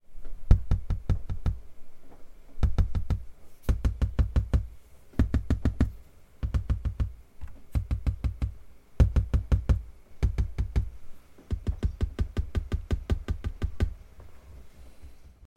tapping on glass
Different sounds of me tapping on a glass table.
glass, knock, knocking, tap, tapping